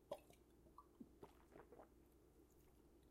Drinking from a glass bottle
Taking a large pull from a glass bottle.
gulp, swallow, bottle, drink, glass, drinking, beer, water, liquid